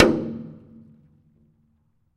One of a pack of sounds, recorded in an abandoned industrial complex.
Recorded with a Zoom H2.